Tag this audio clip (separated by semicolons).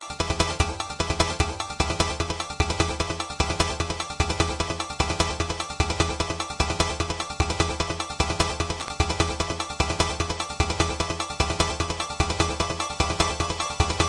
beat; glitch